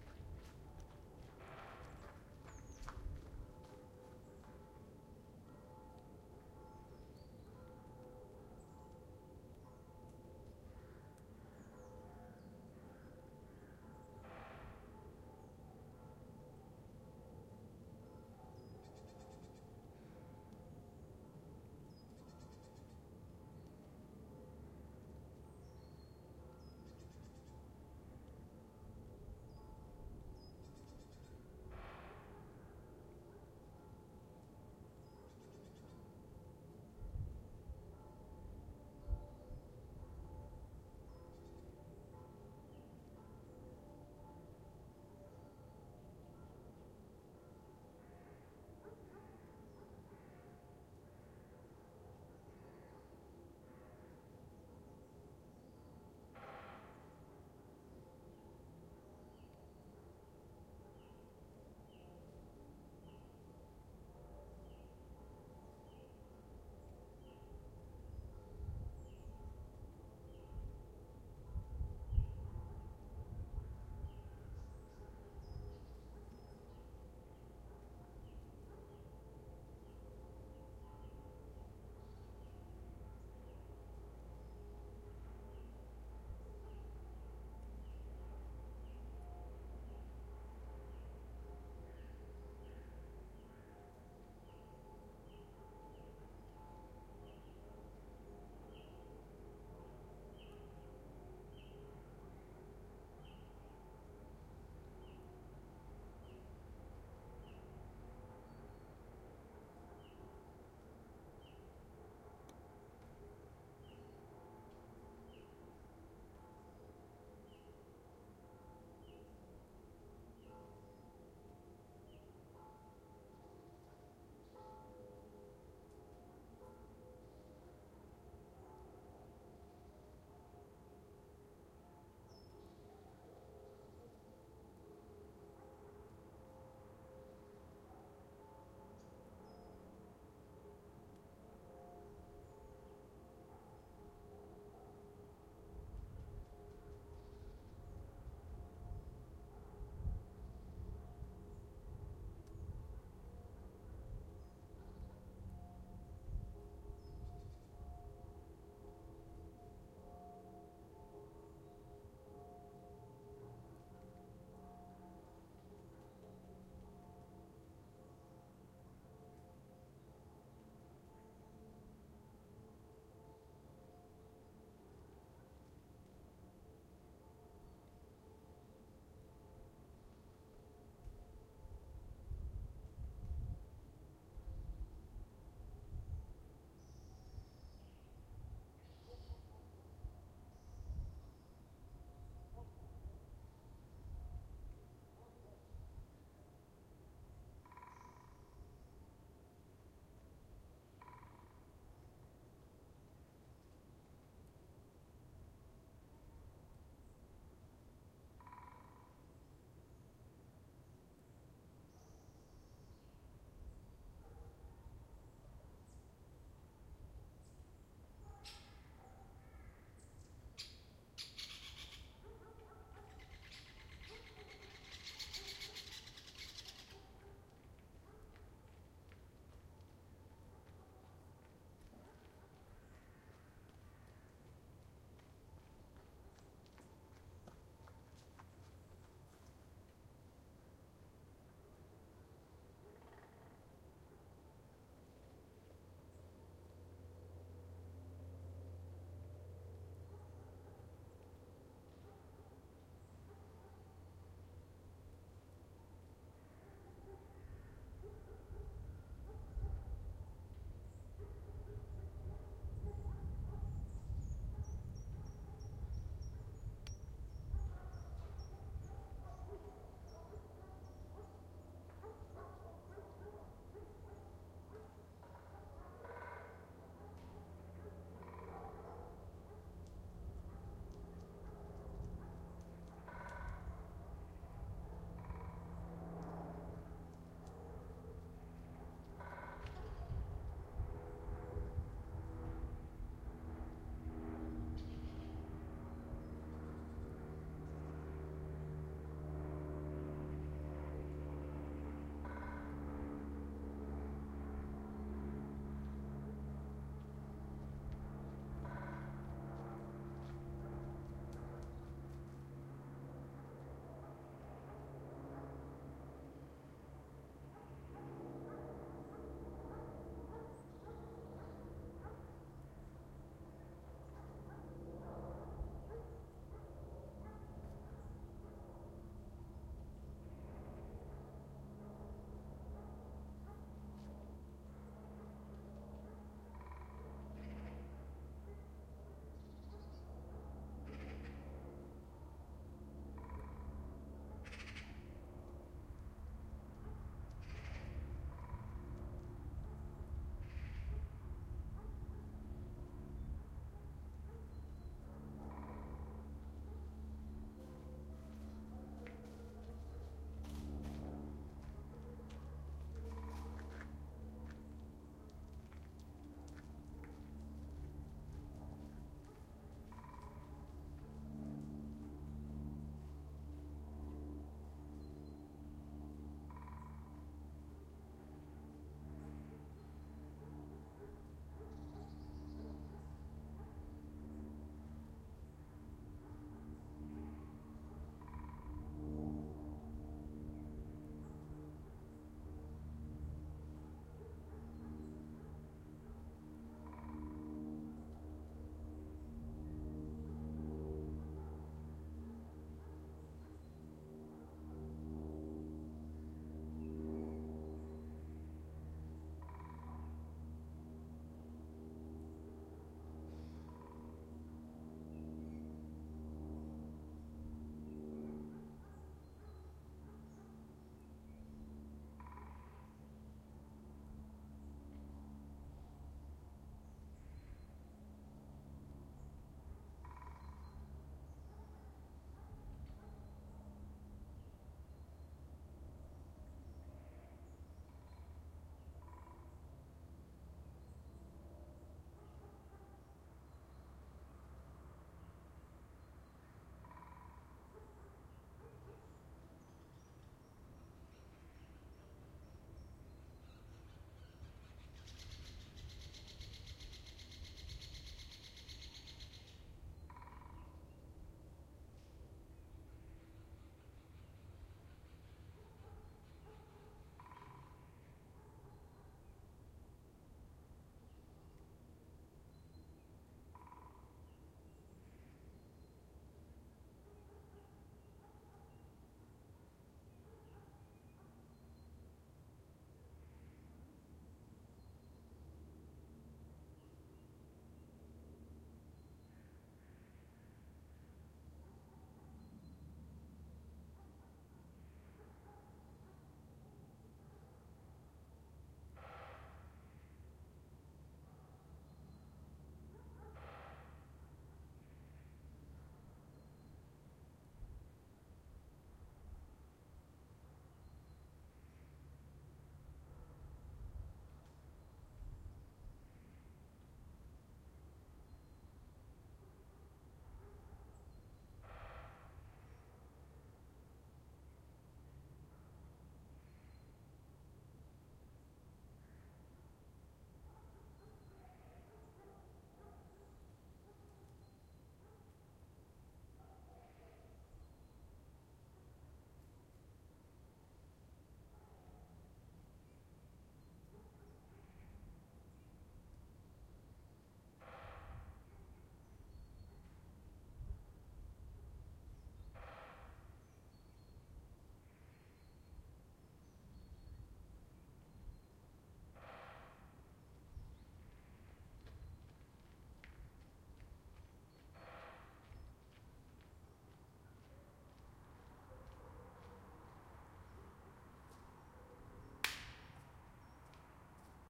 Ambient recording of small forest near Herne, Ruhrgebiet. Front stereo part of 4-channel-surround-recording